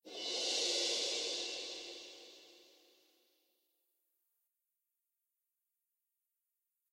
Orchestral Cymbals 1
Just made crashes sound more like an orchestral assembly with mixing crashes and reverb. Its all free enjoy.
FREE